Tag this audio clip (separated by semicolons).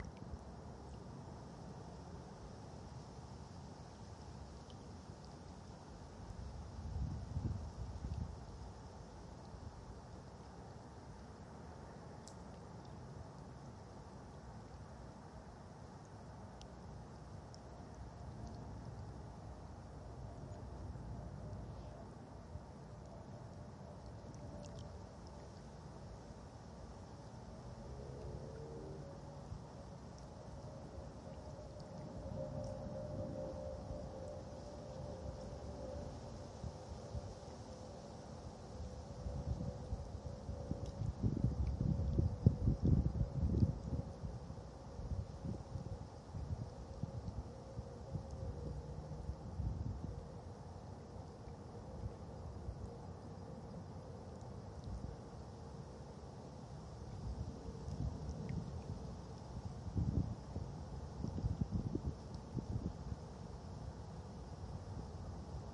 field-recording; lagoon; lake; Ripple; shore; urban; water; waves